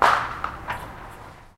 Small bomb with hit
Small bomb exploding and making a crash. Can be used as gunshot. Recorded with Zoom H1.
Pequena bomba explodindo e fazendo barulho de batida. Pode ser usado como tiro de arma. Gravado com Zoom H1.
explosion, bomb, hit, tap, bang, shot, banging, exploding, artillery, boom